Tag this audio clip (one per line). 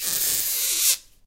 bag
plastic
squeak